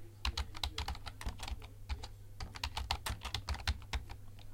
A recording of something being written on a Logitech Cordless desktop keyboard. There's about 20 keystrokes.
Recorded with a superlux E523/D microphone, through a Behringer eurorack MX602A mixer, plugged in a SB live soundcard. Recorded and edited in Audacity 1.3.5-beta on ubuntu 8.04.2 linux.
writing, keyboard